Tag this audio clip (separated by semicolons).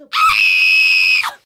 upf; movie; screaming; scream; 666moviescream; shout; frighten